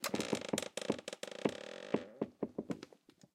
Creaking Chair straining under stress long slow stress strain door opening
Long slow creaking from an office chair that makes a lot of noise, creaking and straining when you sit on it.
This sound could also easily be an old door slowly opening.
There has been some noise reduction and EQing applied.
chair, creak, creaking, door, metallic, open, opening, squeak, squeaky, wooden